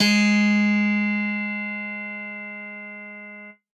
1051 HARD BASS-G#4-TMc-
An original electric bass emulation synthesized in Reason’s Europa soft synth by Tom McLaughlin. Acts as loud samples with MOGY BASS as medium, and MDRN BASS as soft samples in a velocity switch sampler patch.
bass, multisample, emulation, electric, chromatic